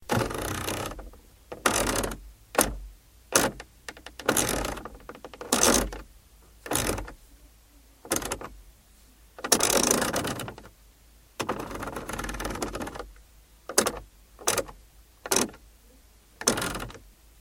Air Temperature Knob, A
Raw audio of a circular car air conditioning knob being turned from hot to cold and vice versa multiple times.
An example of how you might credit is by putting this in the description/credits:
Air, Car, Cars, Cold, Conditioning, Hot, Knob, Slider, Vehicle